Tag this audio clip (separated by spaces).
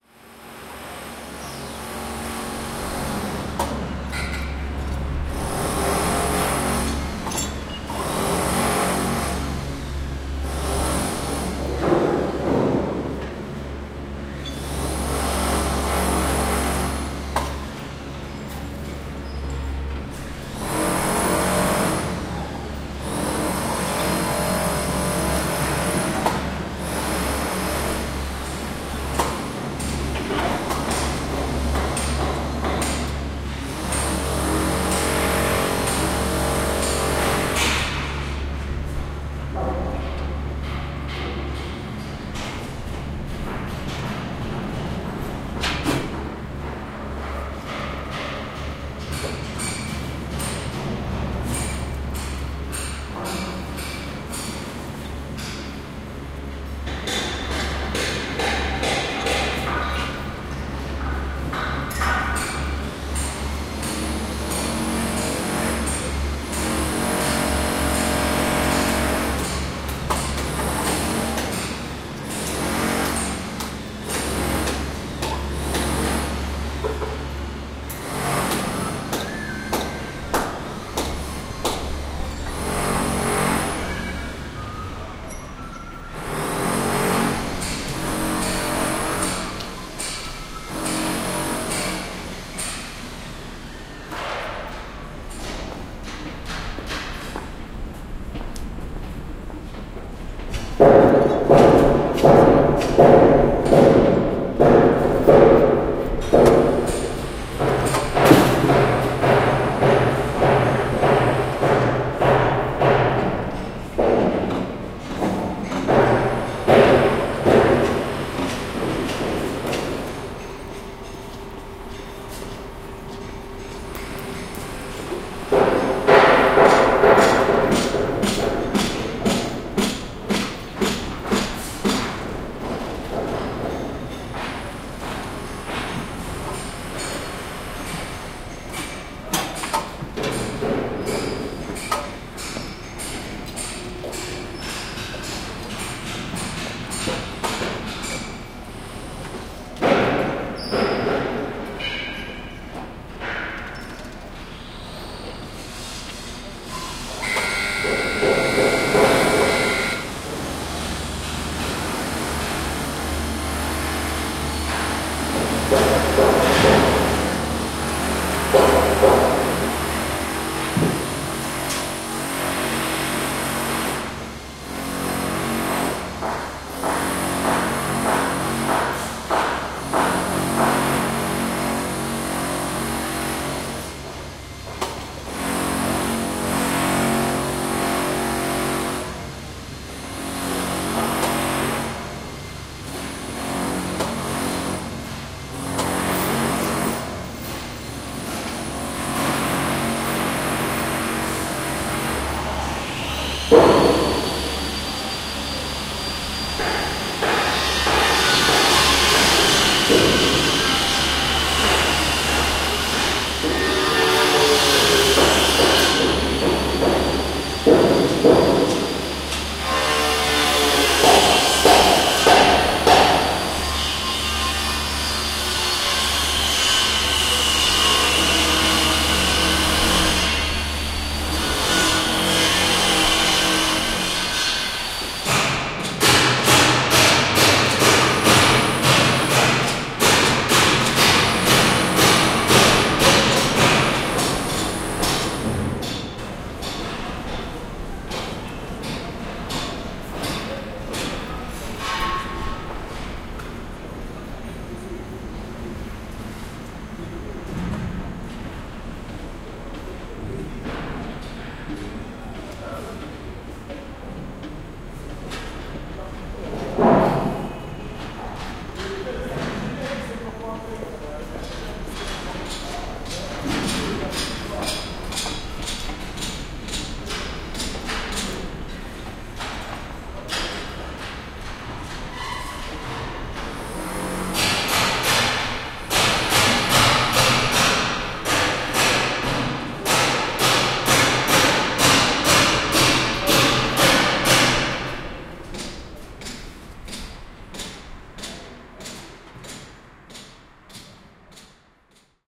btp building-construction-plant chantier construction drilling field-recording hammering roadwork travaux-publics